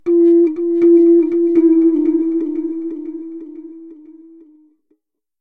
Freed-back - 6
Various flute-like sounds made by putting a mic into a tin can, and moving the speakers around it to get different notes. Ambient, good for meditation music and chill.